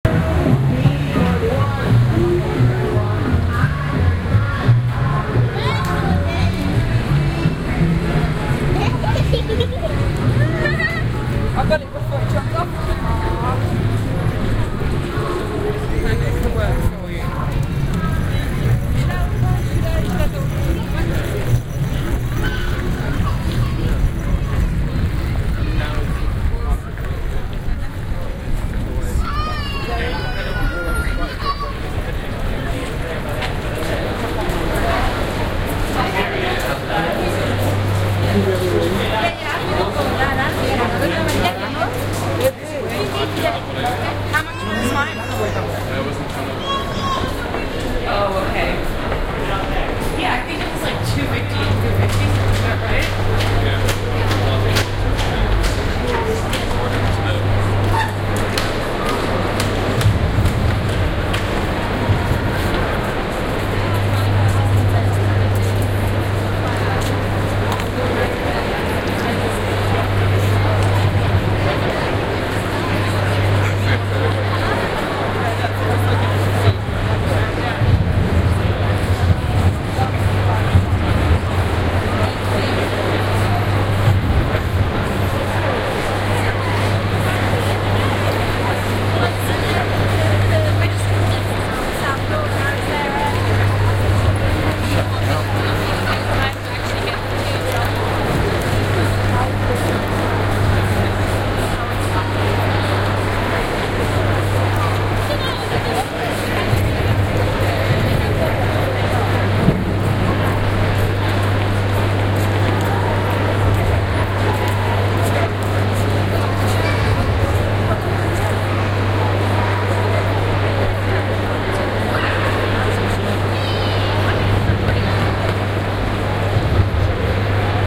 London Bridge - Walking up to Tate Modern
binaural, city, field-recording, london, london-underground, metro, station, train, tube, underground